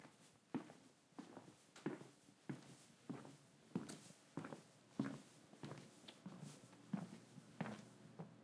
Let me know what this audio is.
ns footstepslinol
A recording of walking on a linoleum floor in sneakers
footsteps, linoleum, floor, sneakers